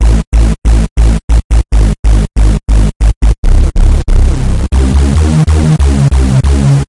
Short decay and release dark hoover.
hoover, hard, dark